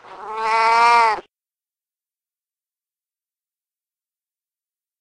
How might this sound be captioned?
Siamese cat meow 1